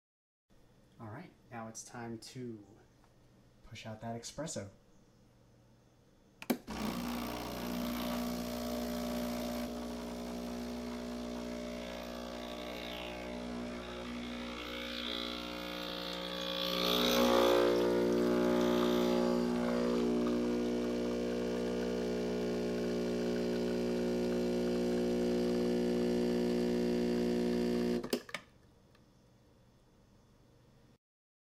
Brewing Espresso
This is a recording of espresso being brewed
This recording has not been altered.
Signal Flow: Synco D2 > Zoom H6 (Zoom H6 providing Phantom Power)
breville, brew, espresso, brewing